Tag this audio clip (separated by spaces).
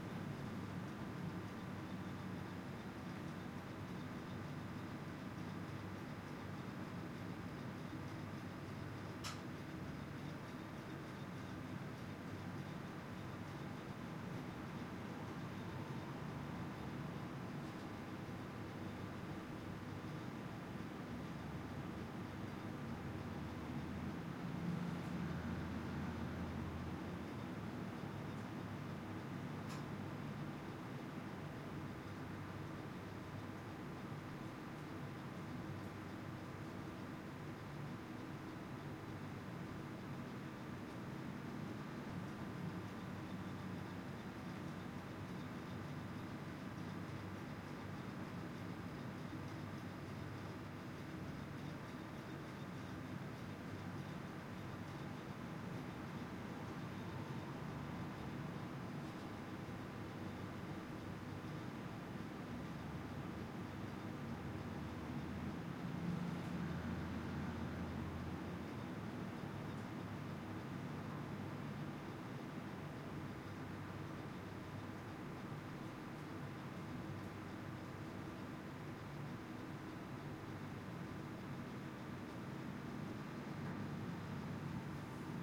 ambiance big-room roomtone white-noise